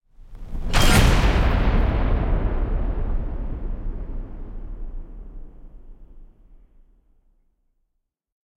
Slow motion gun shot with audible sound of metal mechanism.
Better suits for an old gun with matchlock.